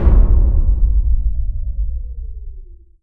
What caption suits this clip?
Explosion sounds make with Audacity with white noise and other types of noise.